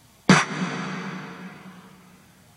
Power Snare 7
A series of mighty, heavy snare hits. Works good with many electronic music subgenres.